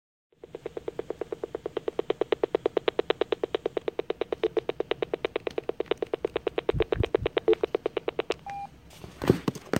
BEEPS AND DIAL TONEVoice 016
Stutter and dial-tone blips.
dial, dtmf, stutter, voltage, tone